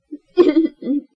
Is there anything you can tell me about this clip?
small laugh2
i recorded my voice while watching and listening to funny stuff to force real laughs out of me. this way i can have REAL laugh clips for stock instead of trying to fake it.
english, female, girl, laugh, speak, talk, voice, woman